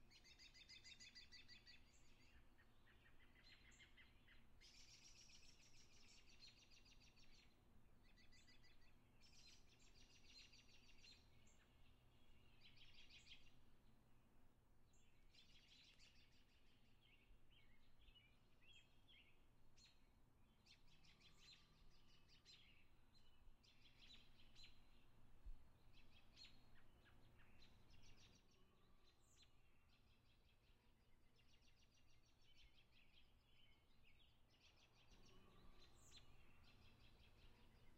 Ambiance Suburbian Forest 2
Outside, Forest